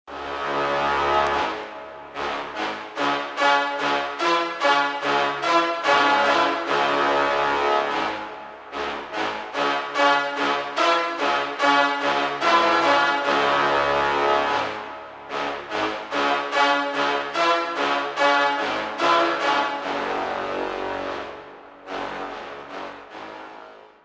Horns that smack you in the face
Confident and Marching Horn Phrase